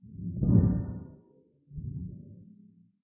tin plate trembling